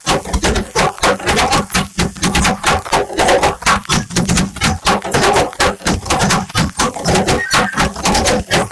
underworld march
a HEAVILY processed tapping on a table sound
processed, beat, experimental